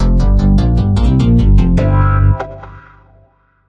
Groove Hit #2
A groove/funky hit made in FL Studio.
2021.
bass, bass-solo, dance, electric-guitar, funk, funky, groove, groovy, guitar, intro, lick, riff, solo, swing, wah-wah